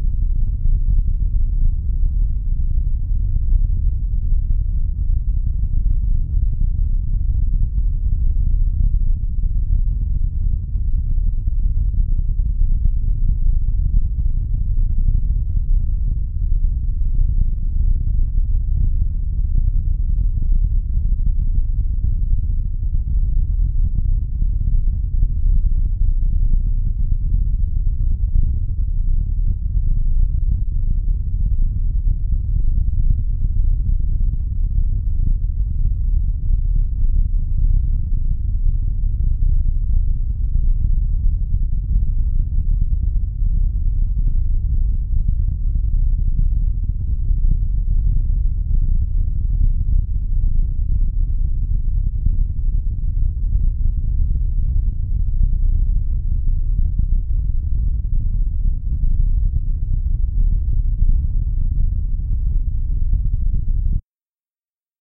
Maybe useful for something science fiction, maybe the inside of a space ship or the lower decks of a space station, the engine room …
From my Behringer 2600 synth.
My own patch.
Recorded with Yamaha MG12XUK Mixer.